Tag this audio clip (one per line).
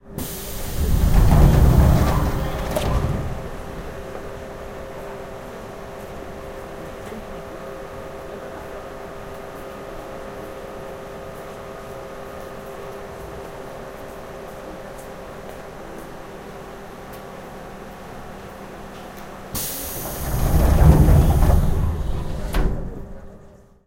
door
field-recording
korea
korean
metro
seoul
voice